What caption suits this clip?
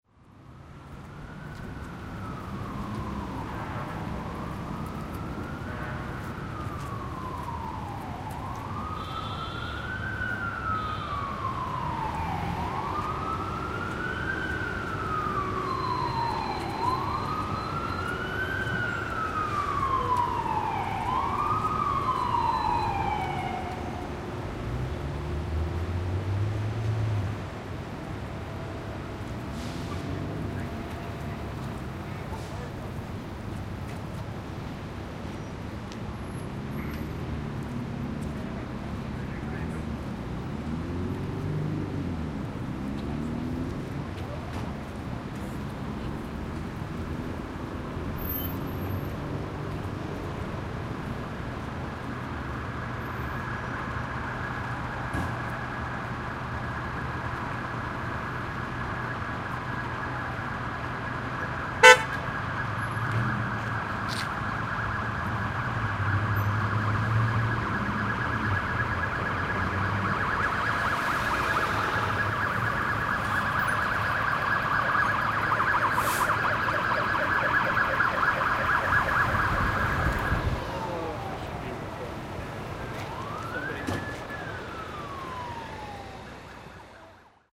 Street Sounds 2
Zoom H2N recording of street sounds on Main Street, Winnipeg Manitoba, Canada.